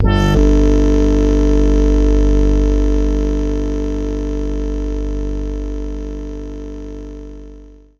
PPG 014 Sustained Organwave G#1
This sample is part of the "PPG
MULTISAMPLE 014 Sustained Organwave" sample pack. The sound is similar
to an organ sound, but at the start there is a strange attack
phenomenon which makes the whole sound weird. In the sample pack there
are 16 samples evenly spread across 5 octaves (C1 till C6). The note in
the sample name (C, E or G#) does not indicate the pitch of the sound
but the key on my keyboard. The sound was created on the Waldorf PPG VSTi. After that normalising and fades where applied within Cubase SX & Wavelab.